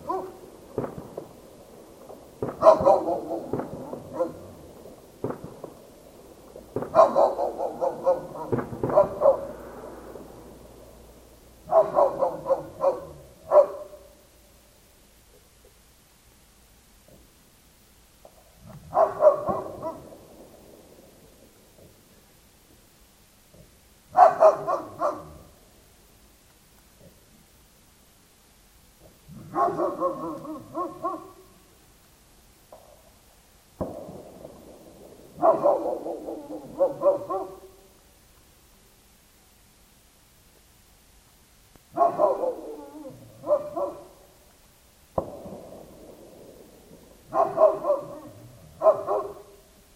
Dogs barking at fireworks
animal, growl, growling, night